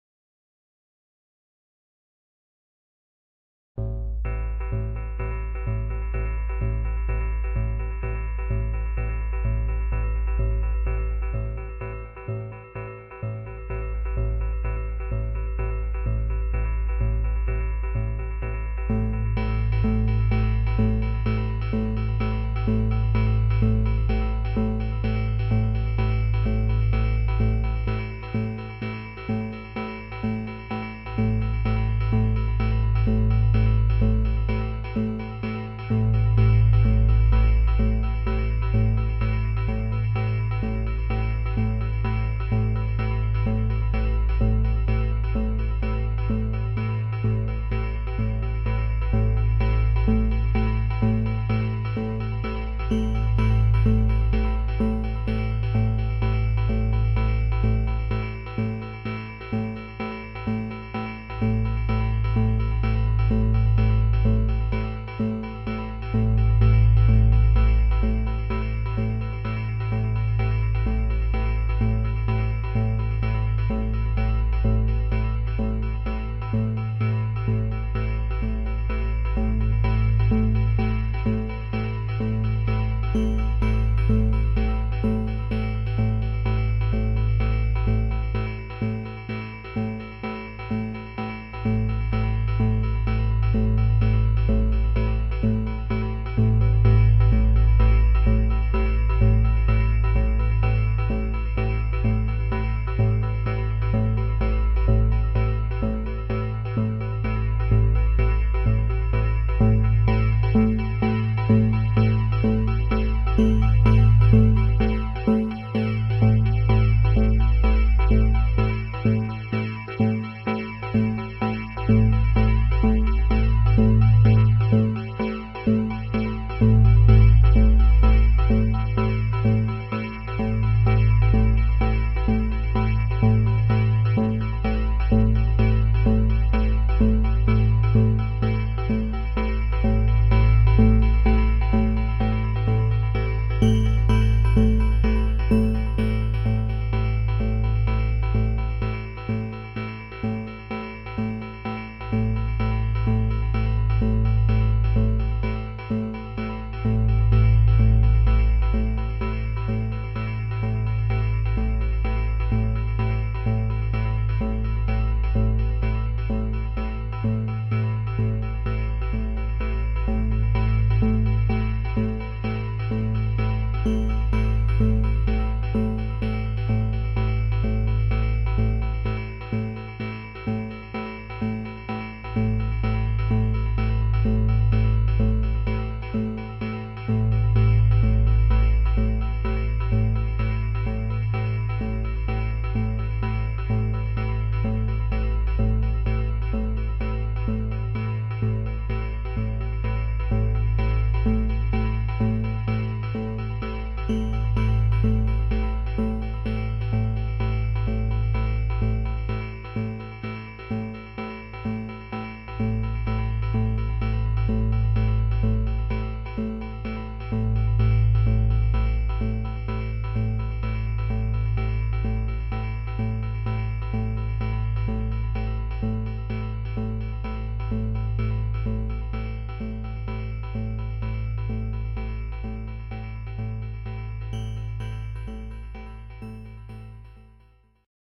Zebra synth doodling, fodder.